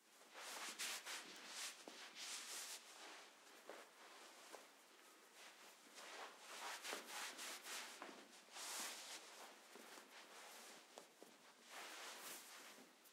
Douche-Sechage
Drying myself after a shower recorded on DAT (Tascam DAP-1) with a Sennheiser ME66 by G de Courtivron.
bathroom, dry, shower